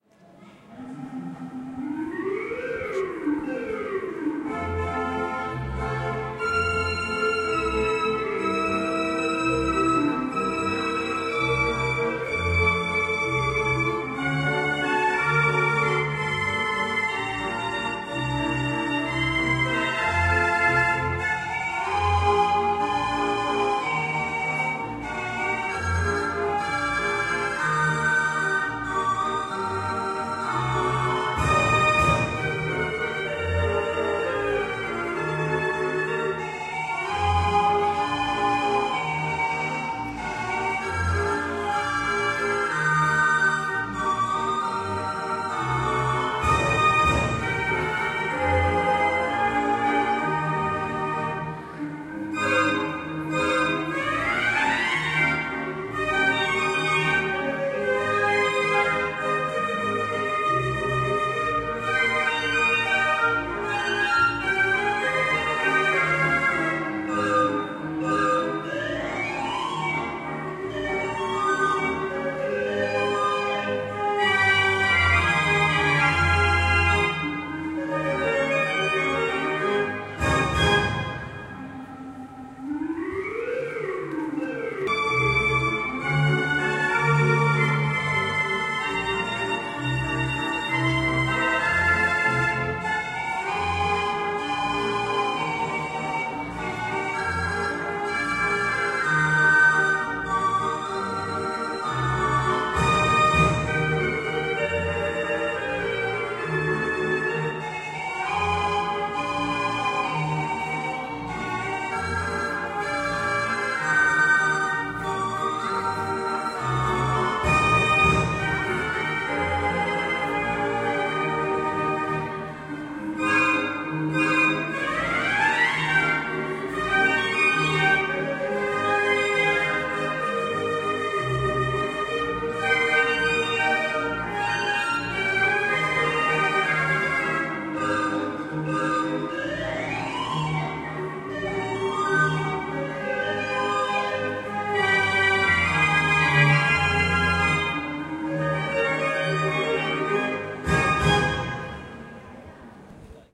Welte Philharmonic Organ
The World Philharmonic Organ was established in 1916 by the company Welte in New York in cooperation with the Company Skinner built. 1994 acquired the Technik Museum Speyer heavily damaged organ. Since 2001, renovation work at the firm Arnold Pierrot GmbH in Bad Schönborn. The organ has 3 manuals, 36 registers, 2592 whistles, a 10-fold Paternoster role changer, wind pressure system, equipped percussion.
welte-philharmonic-organ, mechanical-music-machine, historical-instruments